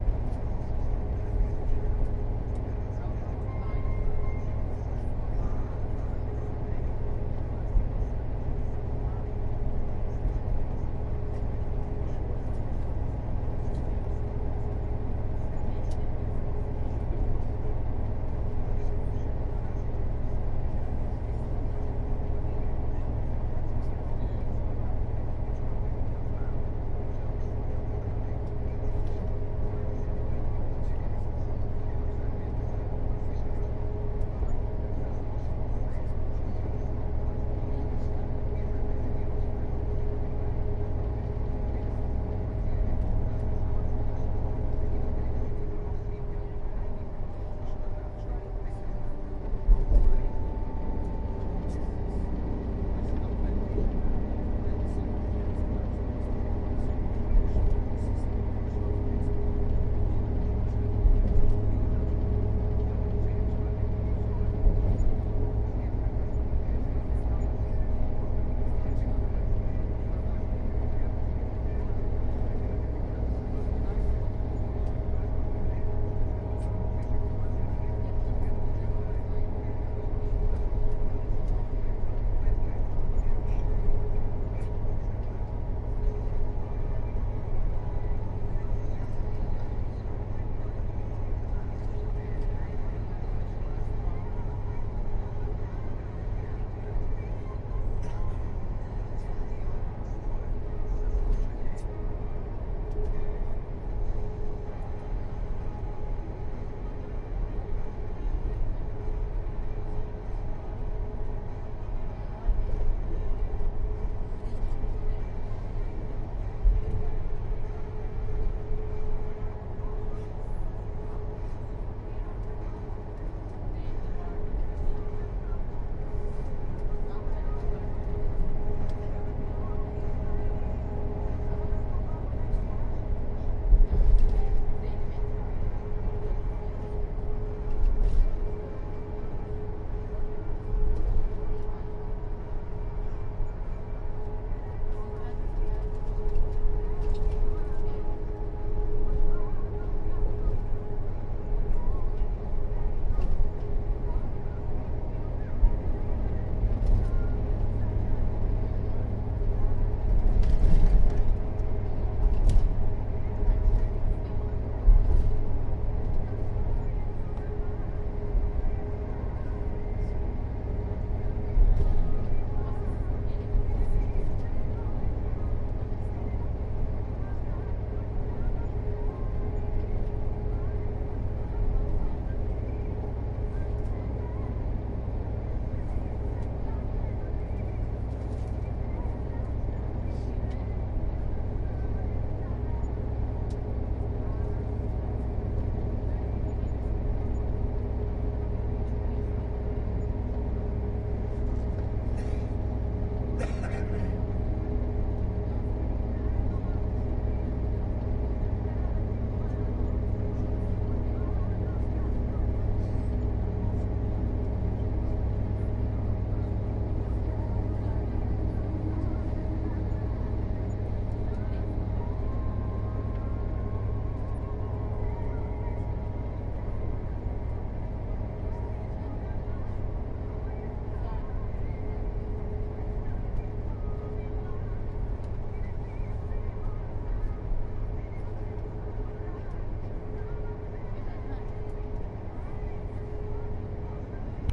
bus interior highway 2
Atmosphere ot the bus interior during ride on the highway.
bus, coach, drive, driving, highway, interior